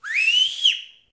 A short powerfull male whistle.
male, whistle, whistling